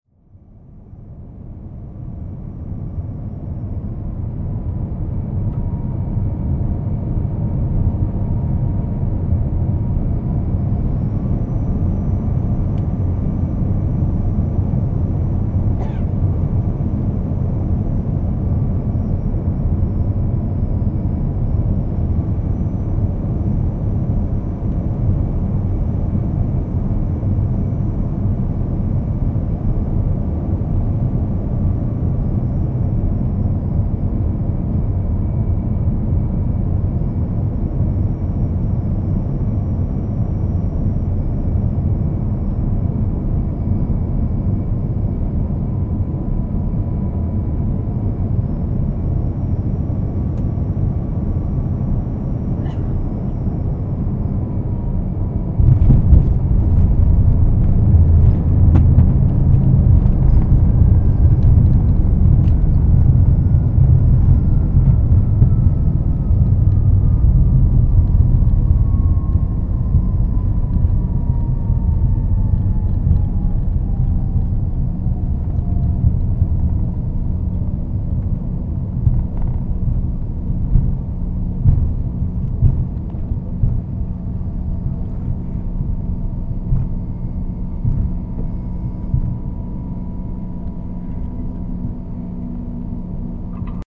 Plane Landing
A very clean recording (no recognizable voices) of the moment a 737 comes in for a landing at Raleigh-Durham International Airport (RDU). You will hear the sound in flight, the landing gear go down, touchdown, and the airplane begin to taxi back to the terminal. At the very MOMENT the flight attendant keys her microphone, I cut the recording. This keeps you legally safe to use this file.
NOTE: Touchdown is at about 55 seconds in...